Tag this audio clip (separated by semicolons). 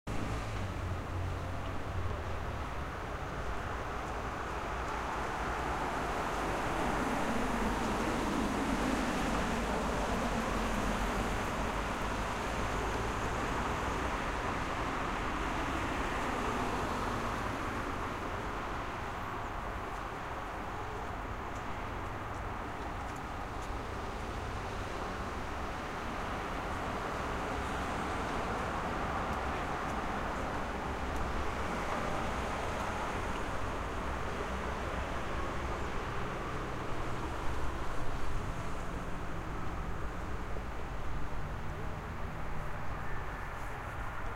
Car; City; traffic2; Bus